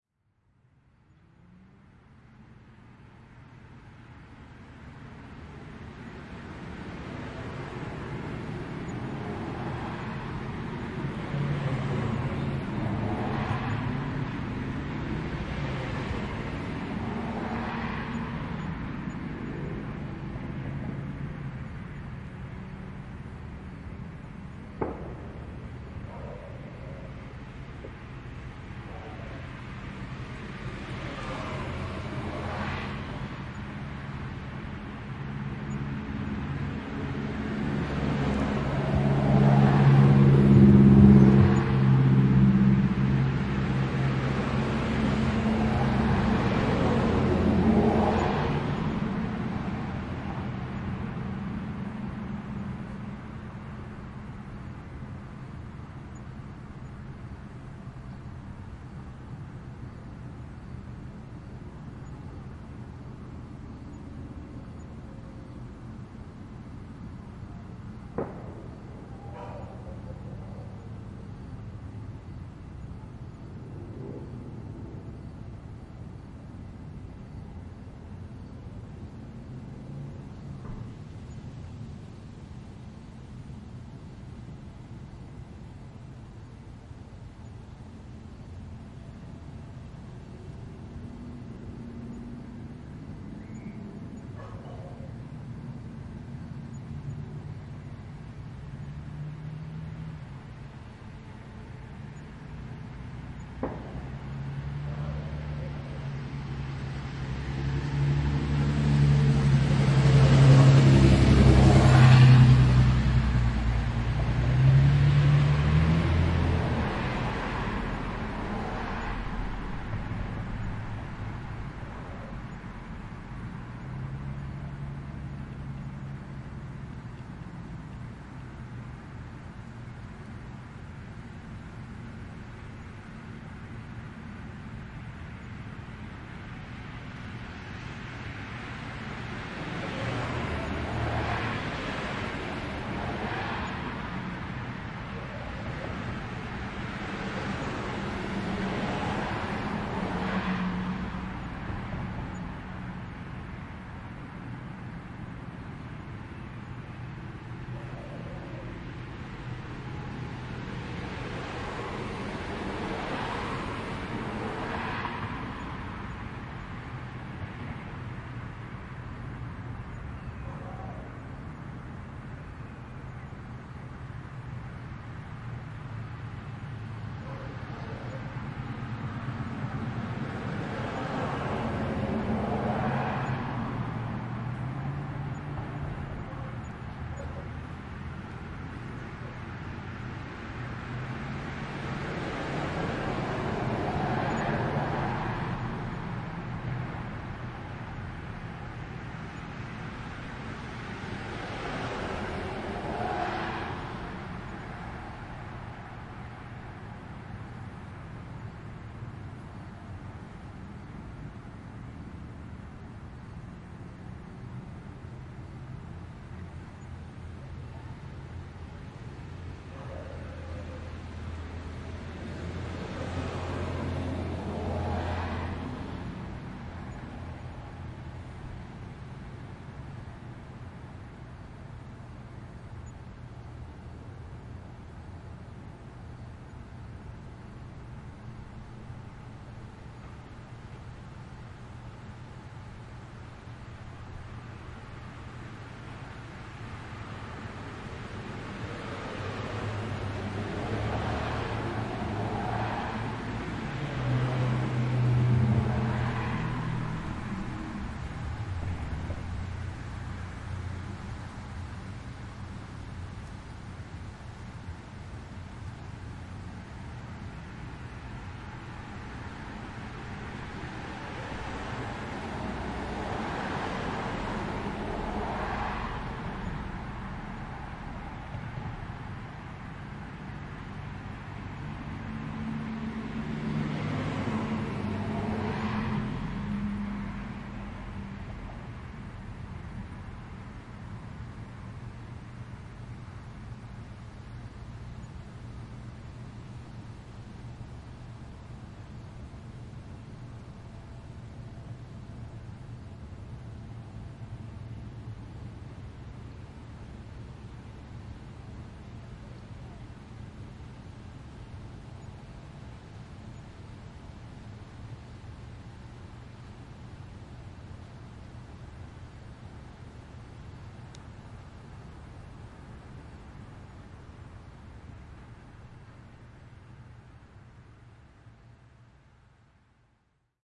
Sketchy Neighborhood Night Ambience
Lots of nighttime traffic and in the first two minutes, several loud vehicles pass and you hear three loud reports of distant fireworks followed by distant barking. There's about three more minutes of traffic and then the noise dies down to neighborhood hum in the last 45 seconds.
night, neighborhood, cherry-bombs, ambience, city, noise, dogs-bark, distant, dog, fireworks, fall, field-recording, traffic, street, cars